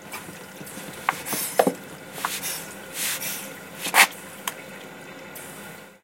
soap use
using soap for dishes
water, washing, dishes, detergent